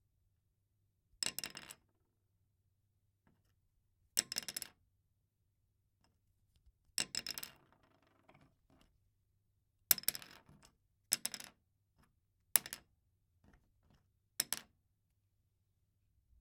pencil drop 2
2B goldfaber pencil being dropped on table.
Recorded with H5 Zoom with NTG-3 mic.